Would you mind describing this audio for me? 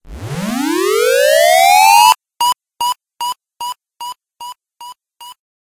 WARNING!beam square+
WARNING!!! LOUD!!! Square buildup/rise made in Audacity with various effects applied. From a few years ago.
Layered, Loud, Rise, Square